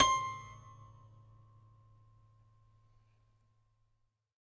multisample pack of a collection piano toy from the 50's (MICHELSONNE)
collection, michelsonne, piano, toy